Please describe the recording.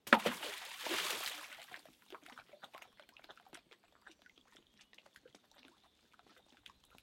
Stone On Water 03
Field-recording, Waves, water, Rock, Nature